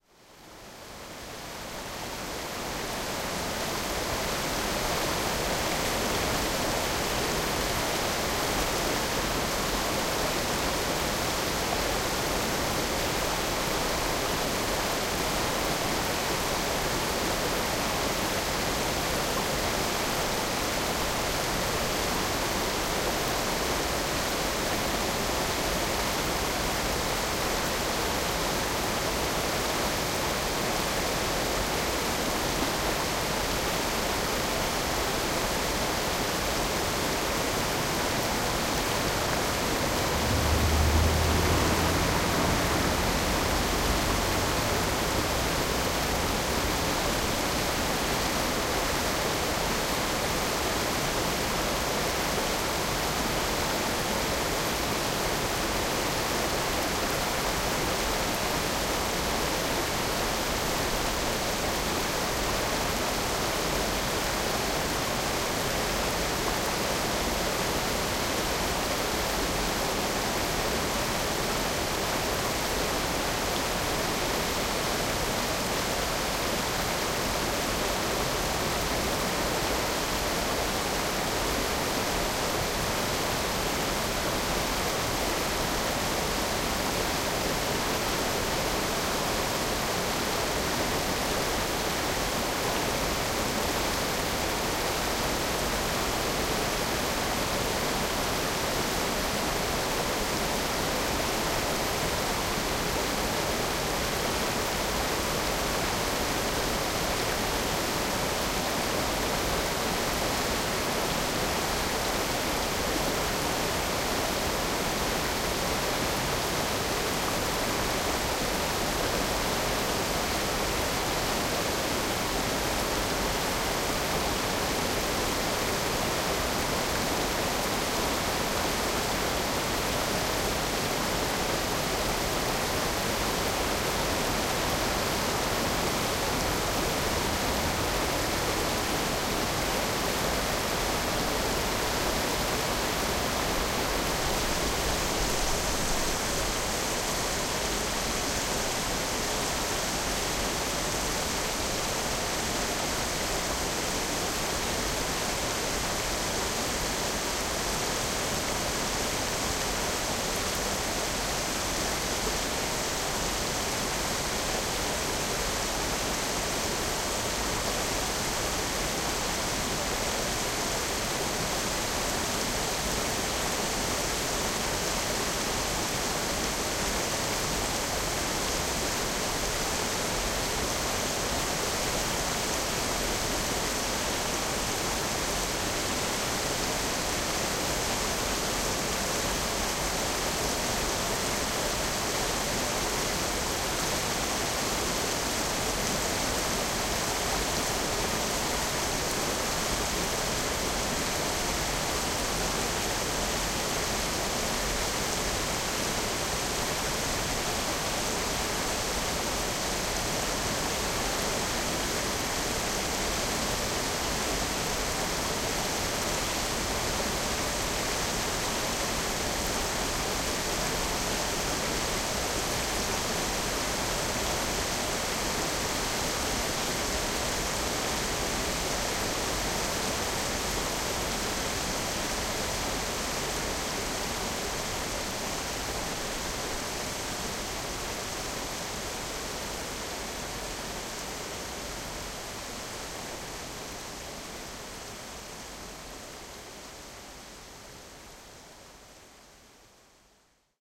Small Waterfall - (Pentalofos - Salonika) 21:33 30.04.12
A small waterfall in Galikos river, near Pentalofos Thessaloniki.
I used two recording modes, @ 90° and 120° angle on my ZOOM H2 Recorder.
I boosted the sample using Adobe Audition CS 5.5 "Masterig Effect".
Enjoy!
Water, Rilax, Waterfall, Ambient, Lake, River, Environment